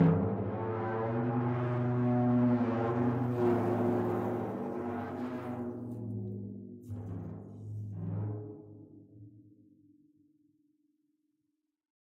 timp superball mallet 3
timpano (kettle drum) played with a superball mallet. starts with a drum hit, then a long groaning sound... imagine pushing a big heavy thing across the floor of a large empty room. (this is an acoustic recording, no effects have been added! the apparent reverb is from the drum itself, not the room)
low, dark, reverb, superball, unprocessed, flickr, timpani